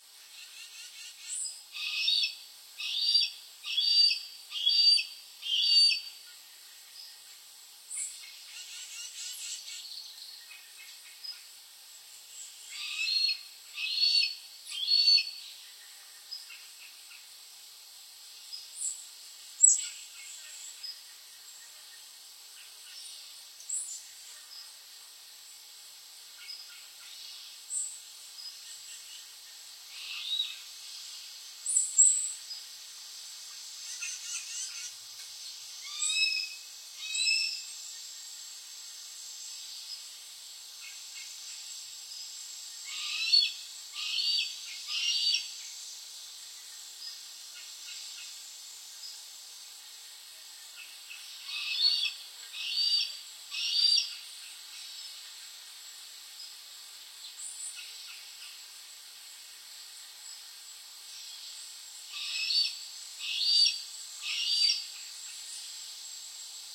A hawk screeching at some distance. Probably a Cooper's Hawk.
field-recording, screech, hawk